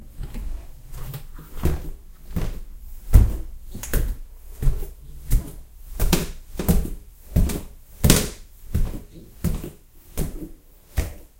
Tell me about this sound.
Getting down from stairs
floor, downstairs, steps, footsteps, walk, walking, stairs